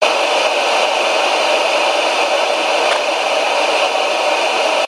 The white noise created by my television when the cable gets disconnected.